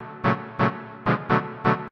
Synth sound with reverb.
epic, one-shot, synth